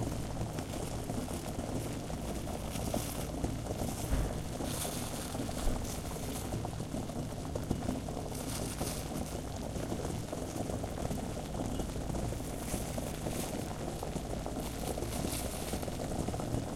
Boiling porridge
food, boiling, porridge